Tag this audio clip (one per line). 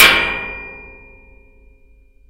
sheet
stomp
metal
metallic
bang
ping
percussion